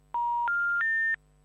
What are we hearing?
busy phone.L
phone, busy